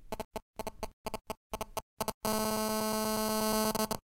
Cell phone interference 2
Interference from a cell phone.
Recorded with a Zoom H1.
beep, cell, communication, computer, digital, electric, electronic, electronics, interference, mobile, noise, phone, radio, signal, static